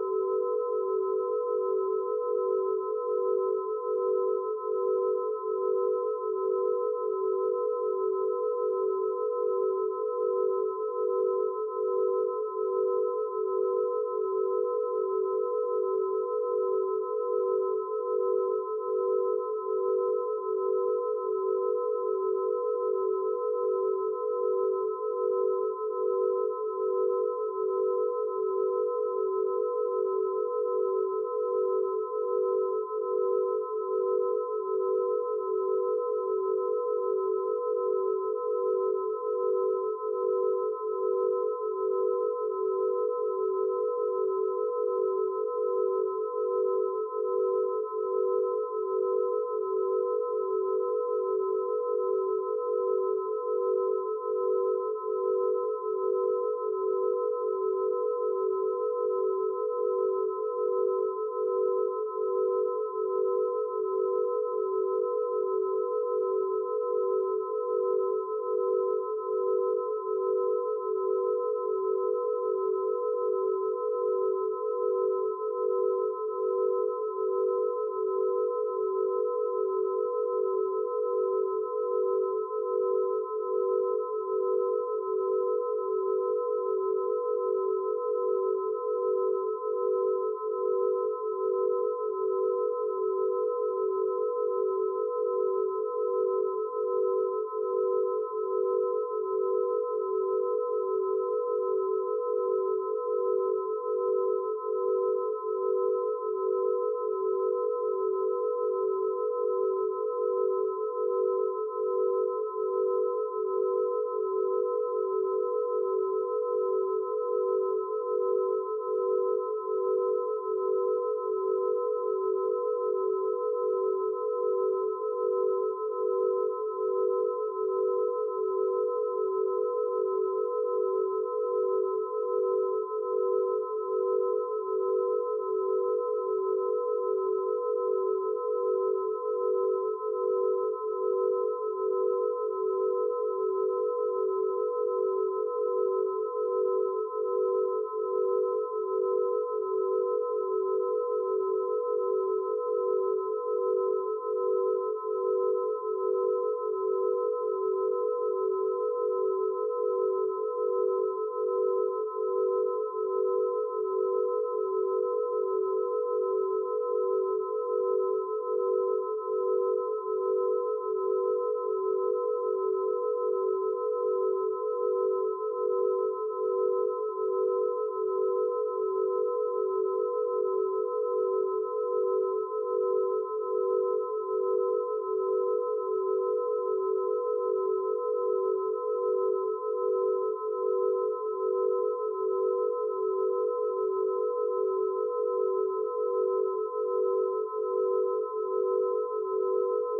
Imperfect Loops 17 (pythagorean tuning)
Cool Loop made with our BeeOne software.
For Attributon use: "made with HSE BeeOne"
Request more specific loops (PM or e-mail)
ambient, background, experimental, pythagorean